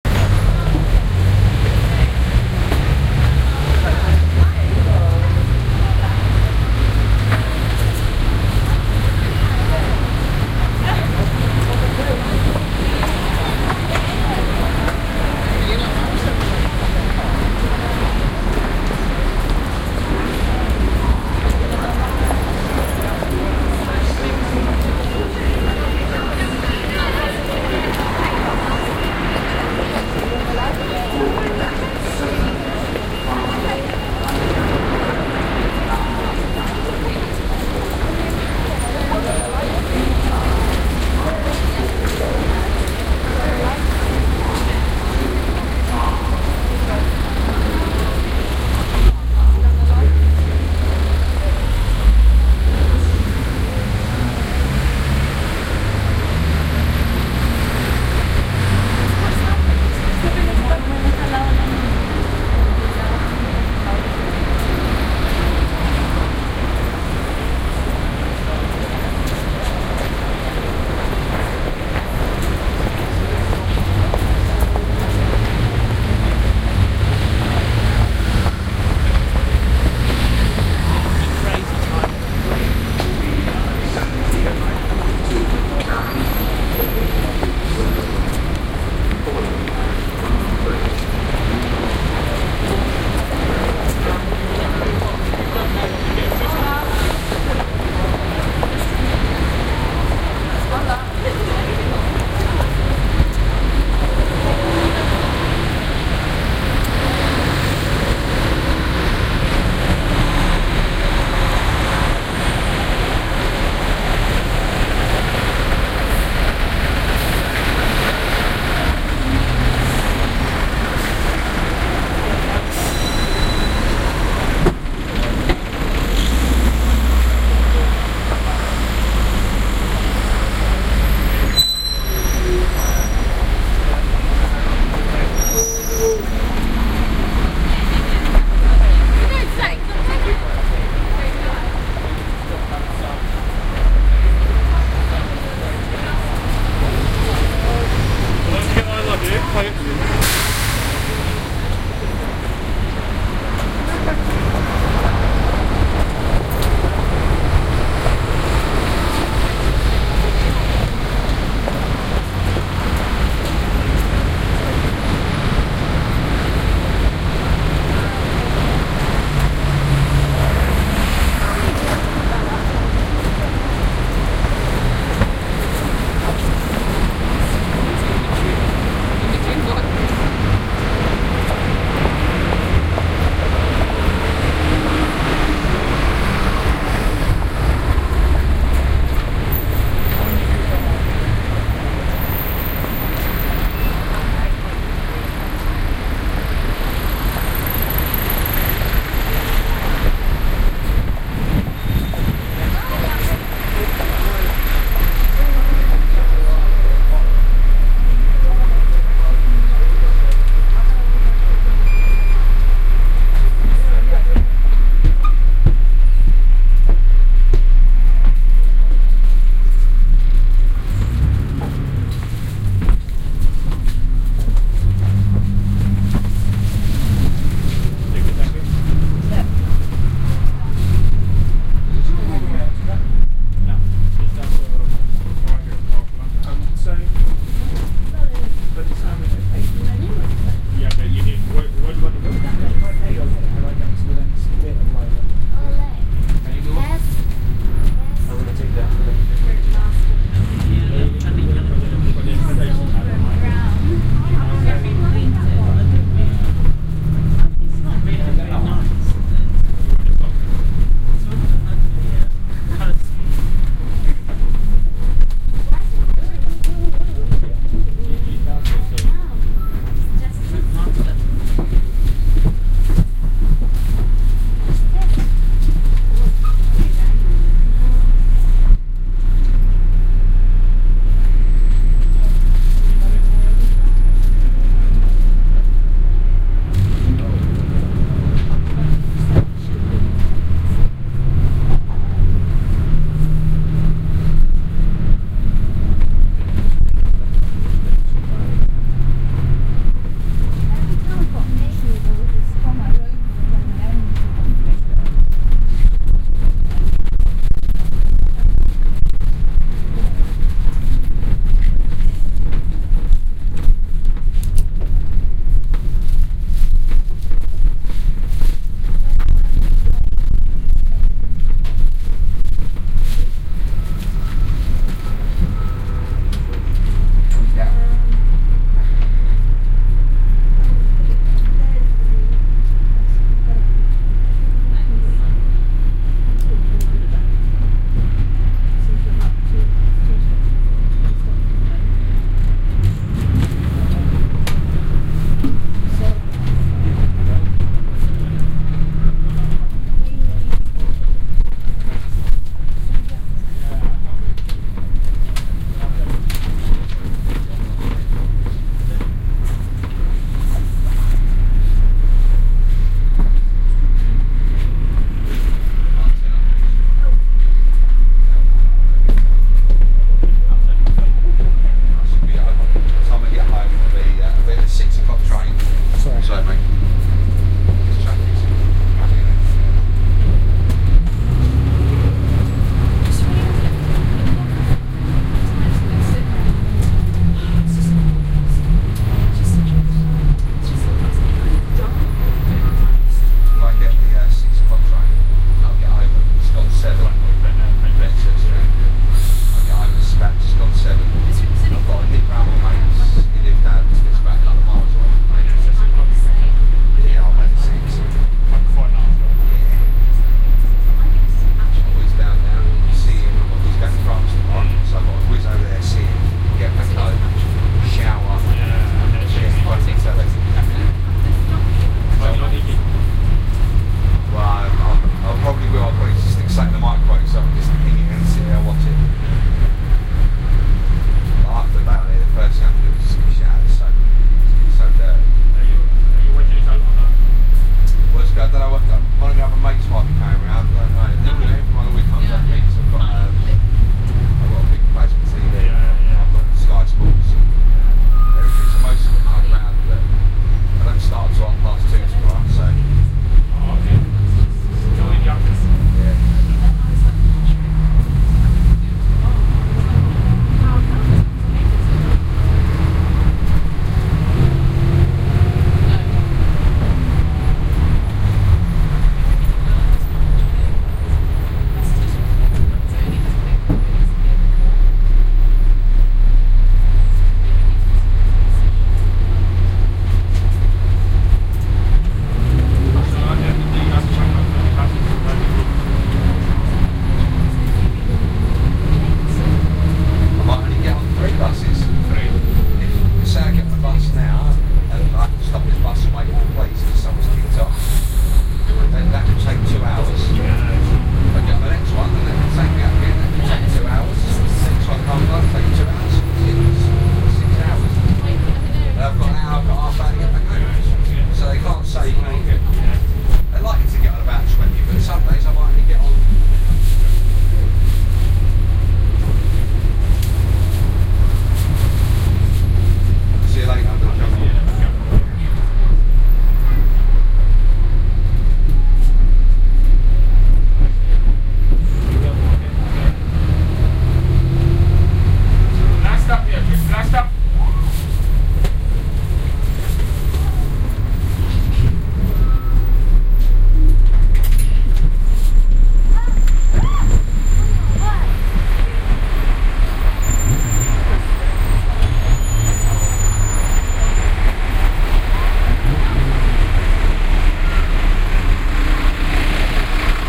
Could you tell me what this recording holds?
Canon Street - Routemaster bus journey
soundscape, london, ambience